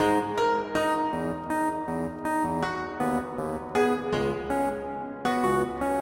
smooth piano and bitcrushed piano loop
smooth, piano, rap, emotional, trap, loop, vibes